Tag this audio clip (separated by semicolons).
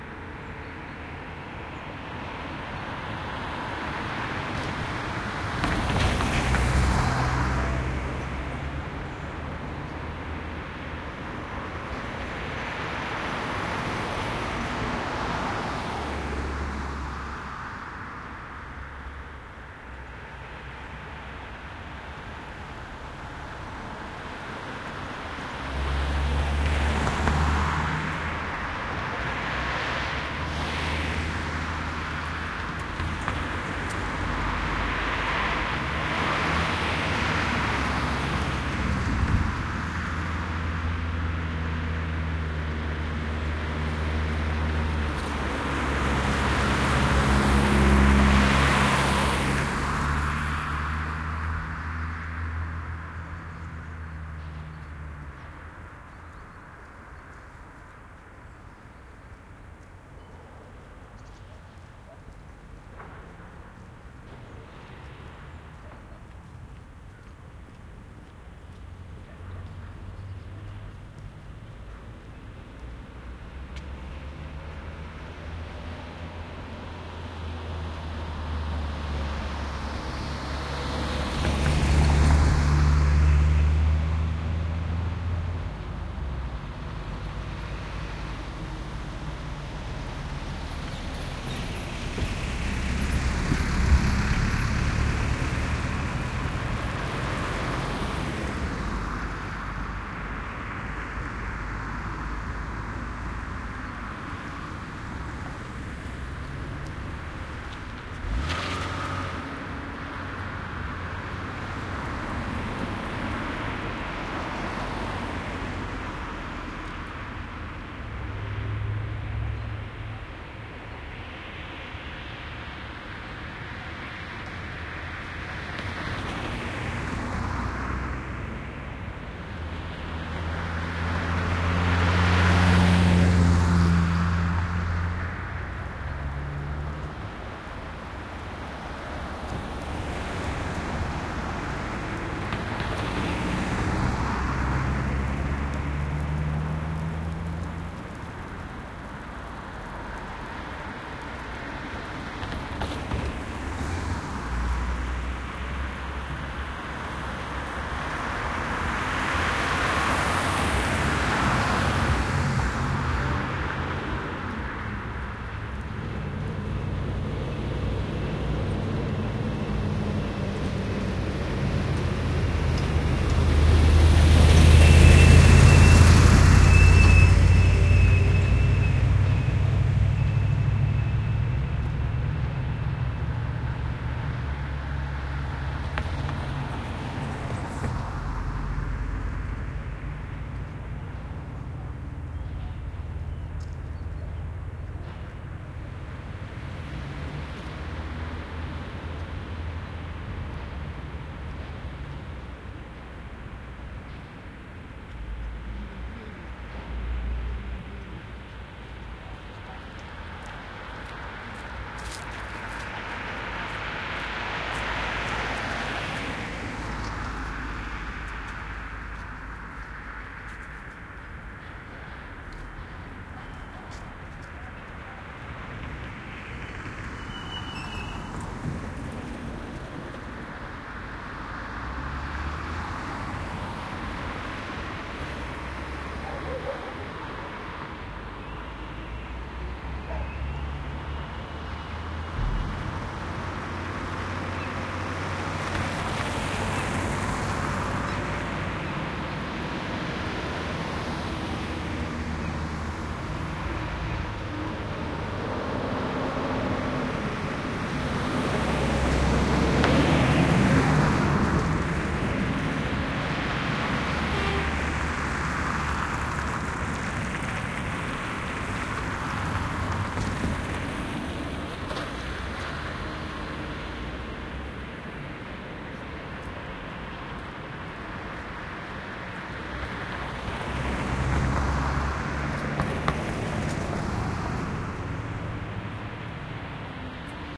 binaural; autumn; field-recording; traffic; crossroad; russia